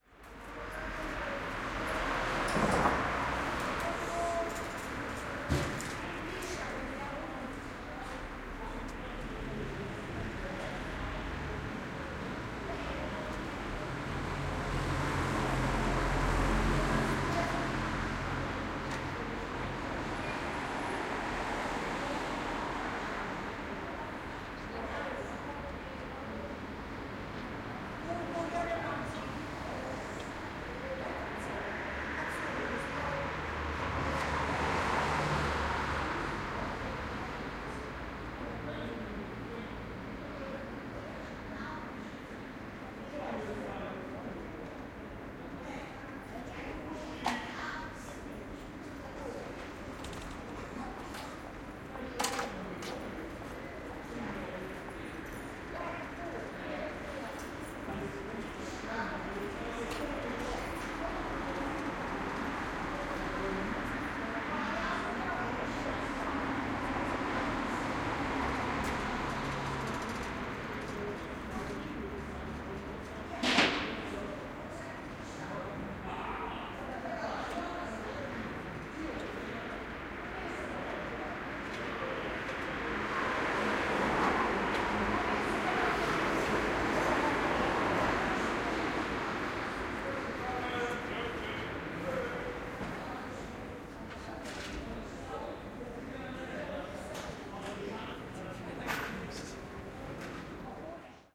Ambience in front of a bar near a street. People talking in front of the bar (in german), cars passing by on the street in front of the bar.
Recorded with a spaced array of 2 KM184 (front) and 2 KM185 (surround) into a Zoom H6.